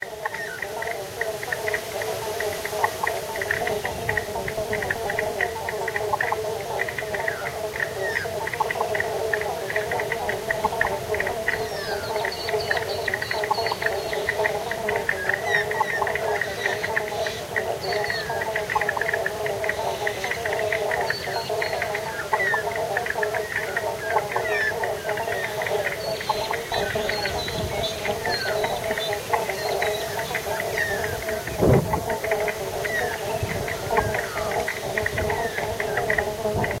sounds of frogs and birds by an overflowing dam
creek, field-recording, water, river, brook, birds, flowing-water, frogs